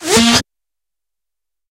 ⇢ GREAT Synth 1 A#
Synth A#. Processed in Lmms by applying effects.